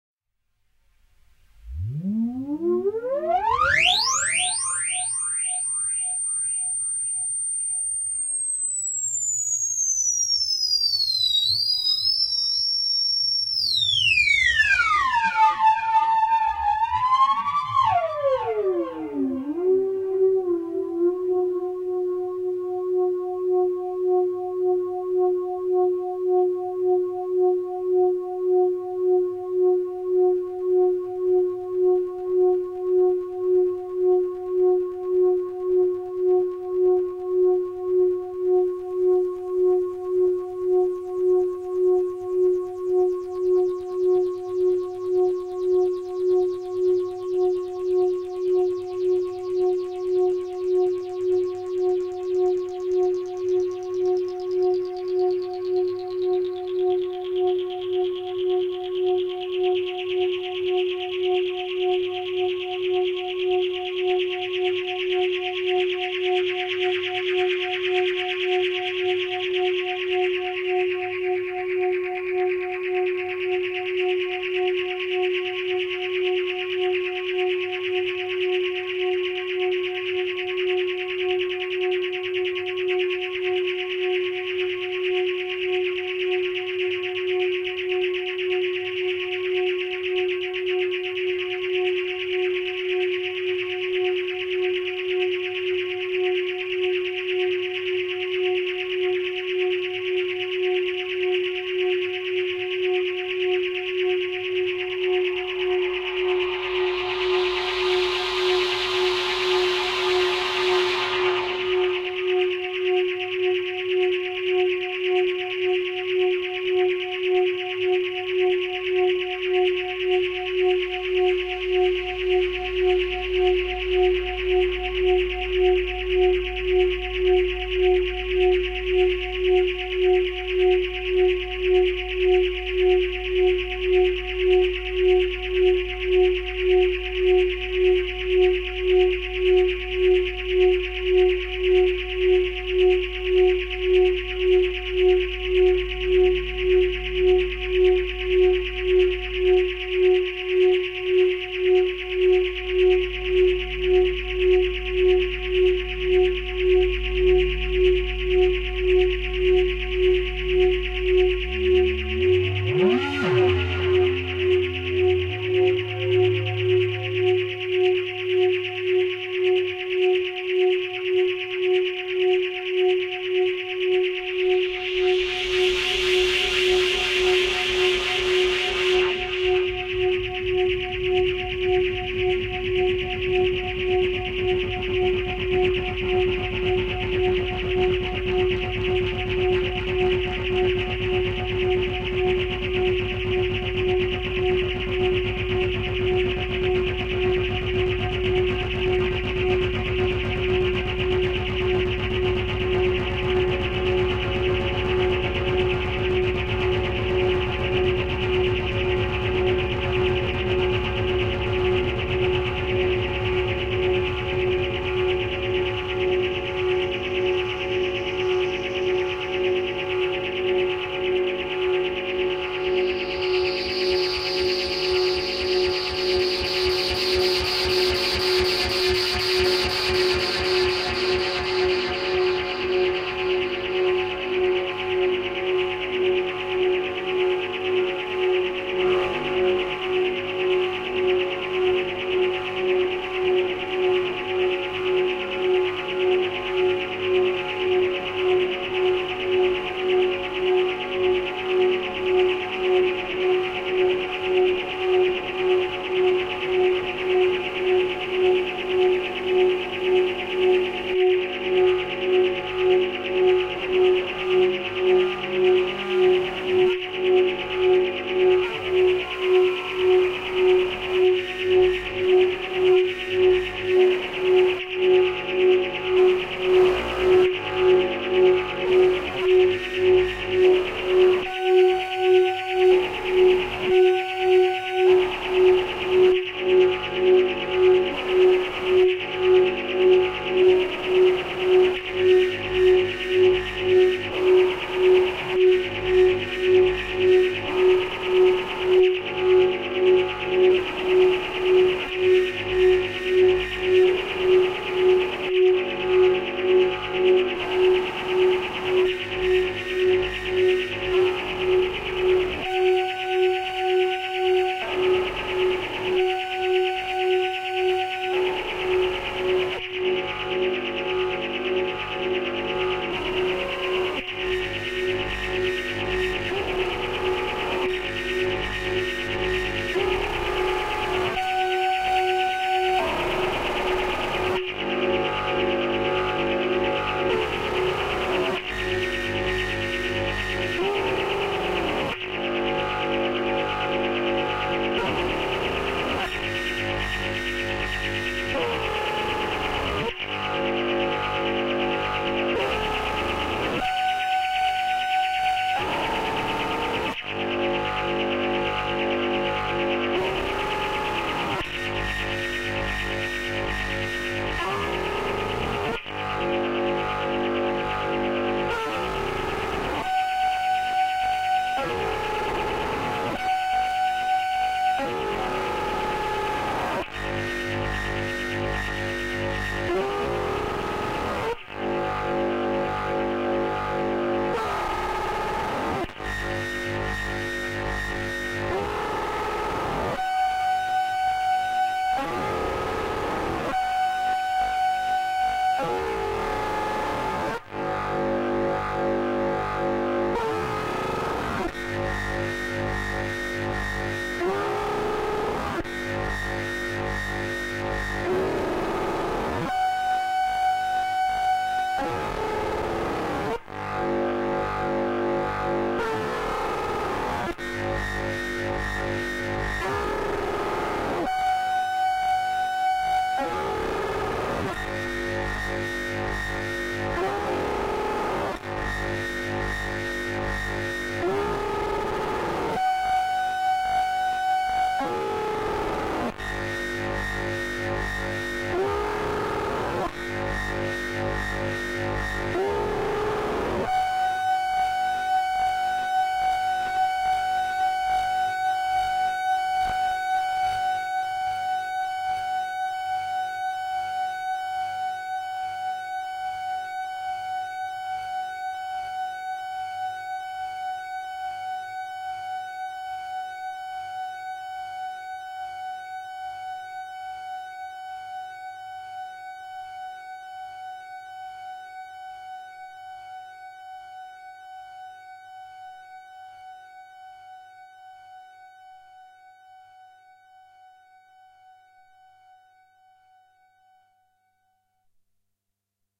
This sound was created using three Korg Monotrons (Original, Duo and Delay) with the help of a Behringer V-amp2 for FX and feedbacks. All the sounds were manipulated in real-time, no post-processing was done to the track. Ideal for sampling and create new SFX or for ambiances. The title correspond to the date when the experiment was done.